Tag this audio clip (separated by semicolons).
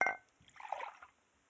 clink; tea